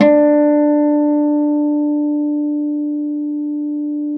A 1-shot sample taken of harmonics of a Yamaha Eterna classical acoustic guitar, recorded with a CAD E100 microphone.
Notes for samples in this pack:
Harmonics were played at the 4th, 5th, 7th and 12th frets on each string of the instrument. Each position has 5 velocity layers per note.
Naming conventions for samples is as follows:
GtrClass-[fret position]f,[string number]s([MIDI note number])~v[velocity number 1-5]
The samples contain a crossfade-looped region at the end of each file. Just enable looping, set the sample player's sustain parameter to 0% and use the decay and/or release parameter to fade the sample out as needed.
Loop regions are as follows:
[150,000-199,999]:
GtClHrm-04f,4s(78)
GtClHrm-04f,5s(73)
GtClHrm-04f,6s(68)
GtClHrm-05f,3s(79)
GtClHrm-05f,4s(74)
GtClHrm-05f,5s(69)
GtClHrm-05f,6s(64)
GtClHrm-07f,3s(74)
GtClHrm-07f,4s(69)
GtClHrm-07f,5s(64)
GtClHrm-07f,6s(59)
GtClHrm-12f,4s(62)
GtClHrm-12f,5s(57)
GtClHrm-12f,6s(52)
[100,000-149,999]:
GtClHrm-04f,3s(83)
acoustic, 1-shot